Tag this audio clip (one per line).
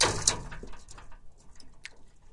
crunchy
break
percussive